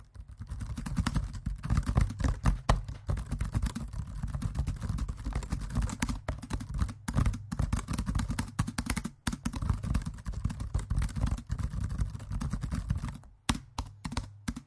Typing Laptop Keyboard 2
Recording, Keyboard, Stereo, Laptop, Typing, H1, Macbook